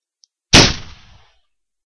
Homeade gun shot1 (NO ECHO)
A quick single gun shot i made through audacity.
bang, fire, gun, shot, Single